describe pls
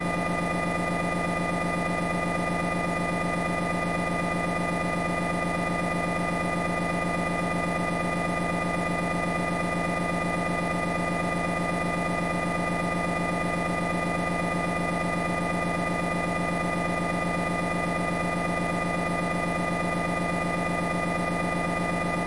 AUDACITY
Stereo channel:
- Cut section 29.076s to 29.199s
- Effect→Repeat...
Number of repeats to add: 180